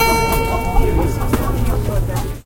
One key of an out of tune harpsichord on a flea market. Recorded on an Edirol R-09 with built-in mics.